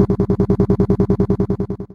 a possible pinball or game sound.